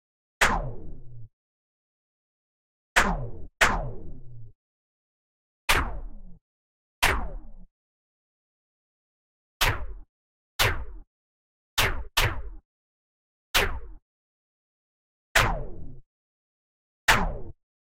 Laser Gun
Combined a portion of a recording of a spring doorstop and my finger raking a metal grate plus an added Sine wave in Ableton's Sampler.
Ableton, Gun, Laser, Sampler, SciFi, Shoot, Shot, Synthesis, Video-Game